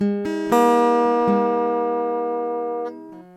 Samples of a (de)tuned guitar project.
chord, oneshot, guitar